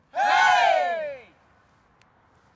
A group of people (+/- 7 persons) cheering and screaming "Yeeaah" - Exterior recording - Mono.